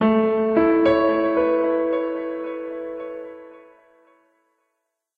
Major climbing sequence, part of Piano moods pack.
piano, calm, delay, mood, reverb, mellow, phrase